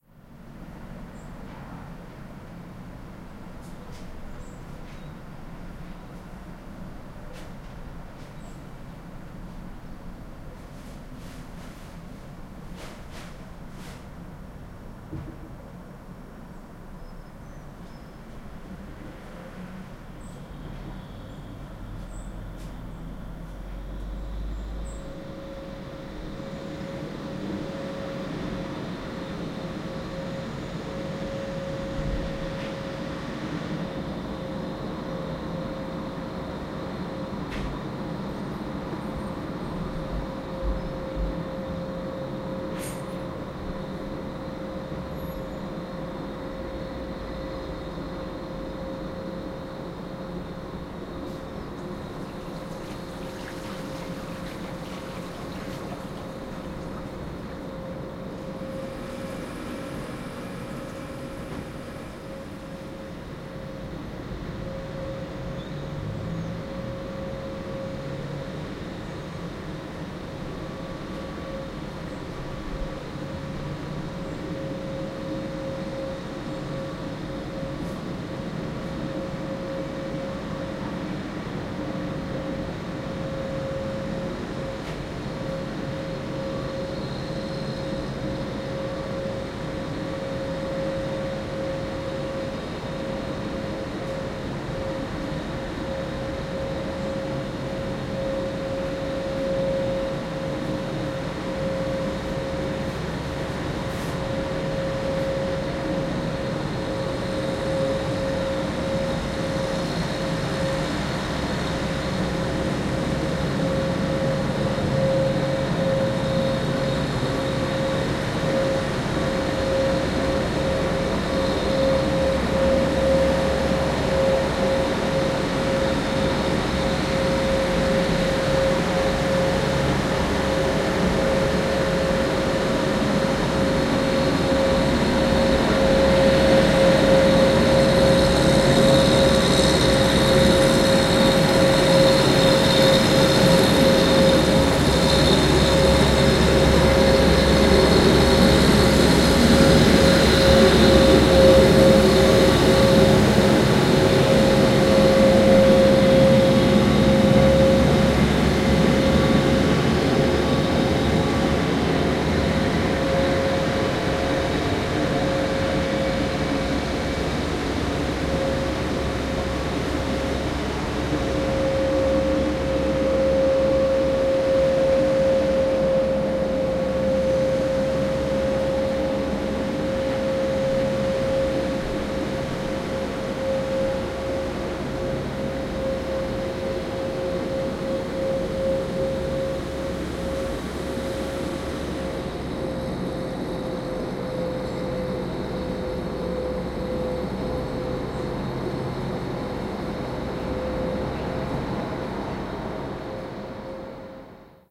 Suburban Residential Weird Heavy Machinery Passing in Background
Garden, Machinery, Residential, Traffic, Unusual